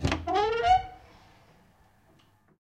Opening a squeaking door to a room. (Recorder: Zoom H2.)
unprocessed, recording, door